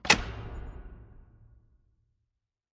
Light - Heavy lights turned on
I edited some sounds together to create this heavy "turn on the light switch" I needed for my project.
electricity, hall, heavy, Industrial, lamp, light, storage, switch, toggle, turn, warehouse